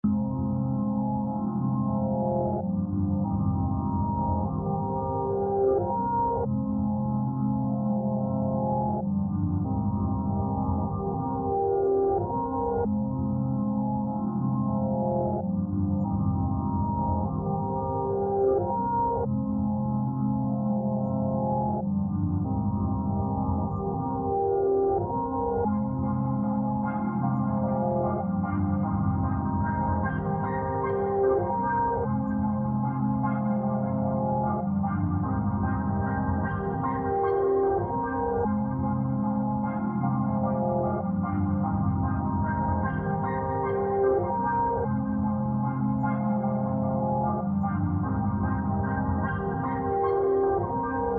Drake Interlude Type Piano
Short unused chop of a drake type beat.
2019, Chopped, Da, Drake, Effect, FX, Lead, Lofi, London, Loop, Melody, Minor, Noah, On, OvO, PARTYNEXTDOOR, Pad, Piano, RnB, Solar, Track, atmosphere, beat, free, keys, pack, sample, space, type